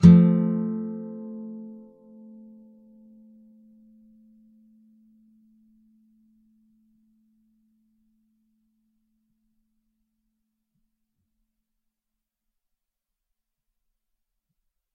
F Major. A (5th) string 8th fret, D (4th) string 7th fret. If any of these samples have any errors or faults, please tell me.
acoustic, bar-chords, nylon-guitar